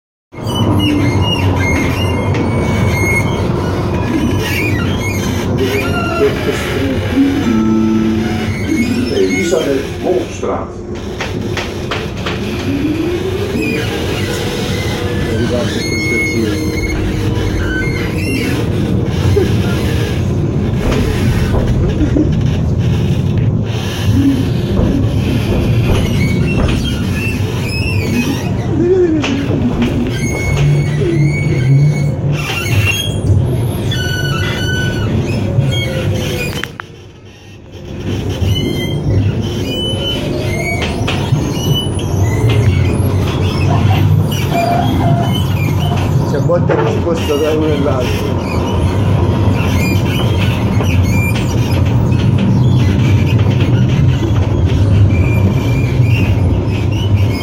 Amsterdam bus
Inside the bus in Amsterdam. Strange creepy sounds coming from it
highfrequency, loop, tram, creepy